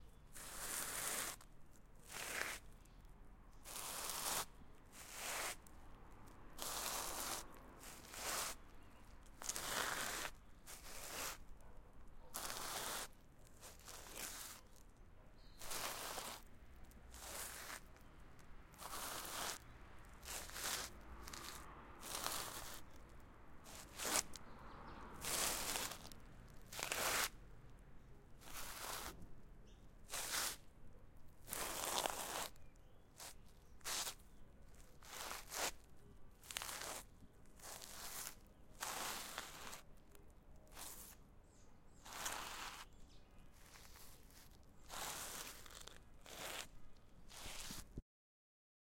Shoes dragging on gravel OWI
shoes dragging on gravel
dragging, gravel, shoes